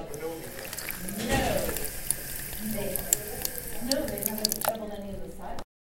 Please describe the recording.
This is the sound of a water fountain with a little bit of background noise.